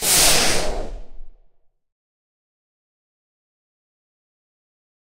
Missile Blast 2
A rocket launching sound slightly edited with some EQ an extra layer to make it sound deeper. Derived from a rocket being launched on 2020's Guy Fawkes night.